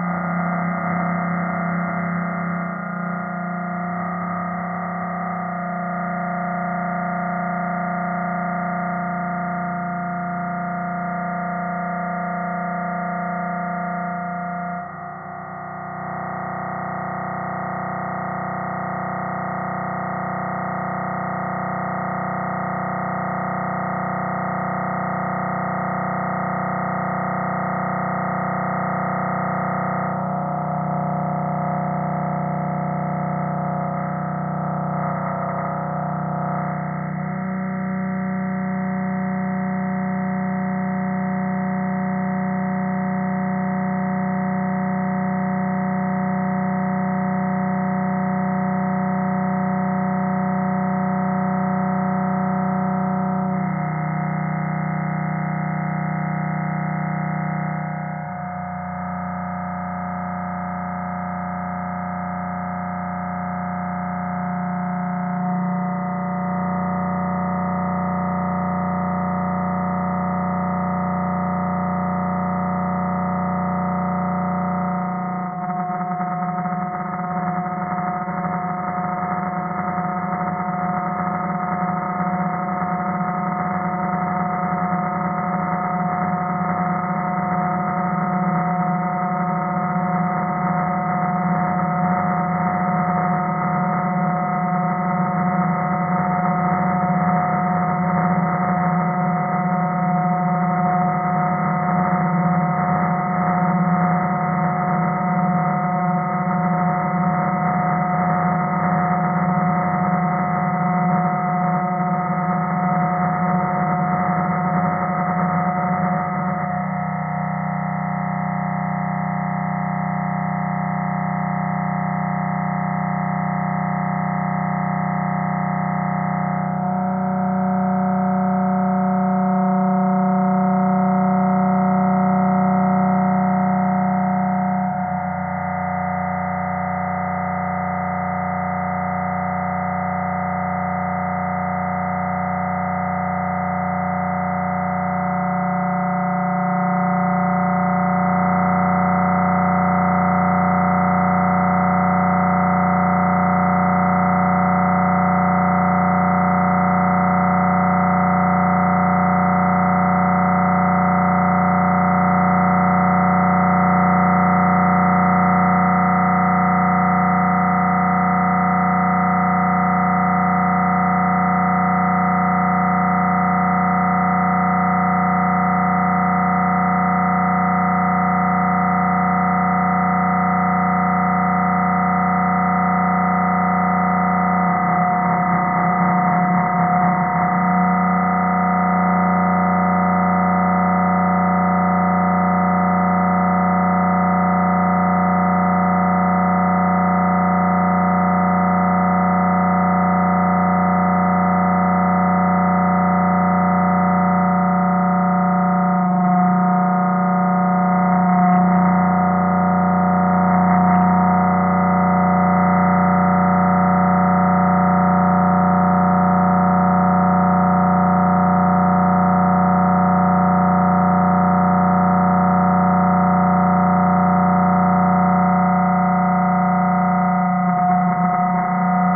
An experiment to see how many sounds I could make from a monophonic snippet of human speech processed in Cool Edit. Some are mono and some are stereo, Some are organic sounding and some are synthetic in nature. Some are close to the original and some are far from it.